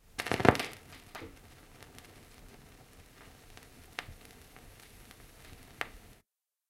A vinyl record starts playing on a record player. No music, just the crackling sound!
Recorded in stereo on a Zoom H1 handheld recorder, originally for a short film I was making. The record player is a Dual 505-2 Belt Drive.